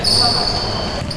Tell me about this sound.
Loud squeaking noise produced by friction with the shoes and the wood floor.